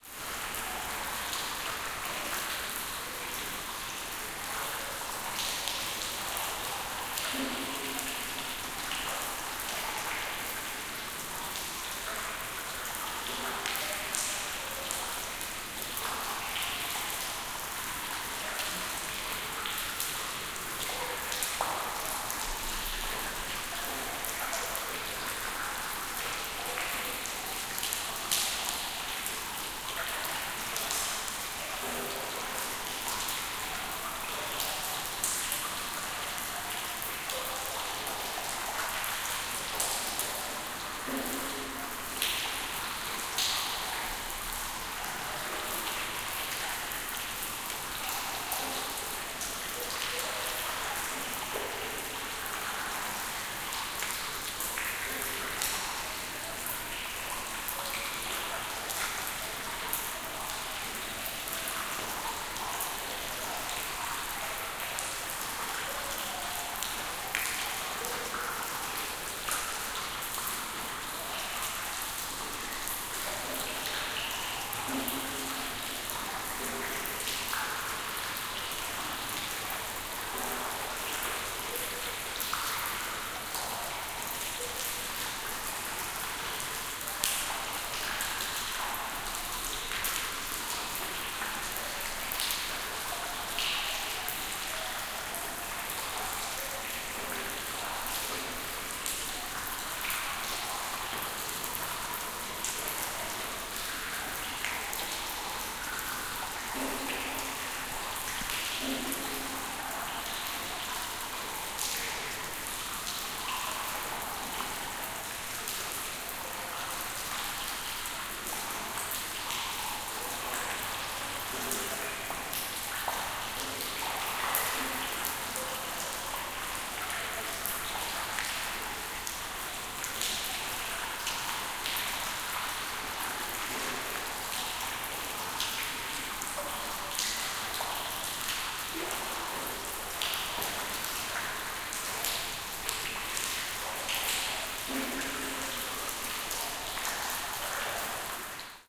teufelsberg tropfen1
We spent the afternoon at the ruins of the abandoned radarstation on the top of Teufelsberg in Berlin. It had been raining in the morning so inside the whole building there were different kind of drops to record. this is a binaural-recording. it sounds amazing with headphones.